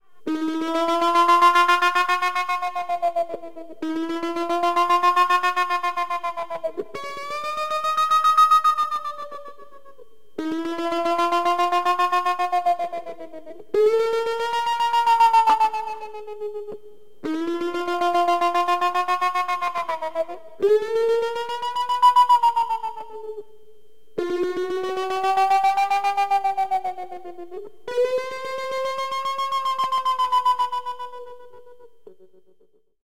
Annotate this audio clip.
trem wah
Sound is guitar into Danelectro tremolo and ME-30 on a wah setting. Not much else that I can remember. Another example of me trying to rip off Throbbing Gristle.
vocal, bend, voice, wah, tremolo, guitar